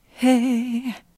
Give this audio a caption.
Female Voc txt Hey
Short parts of never released songs.
If you want you are welcome to share the links to the tracks you used my samples in.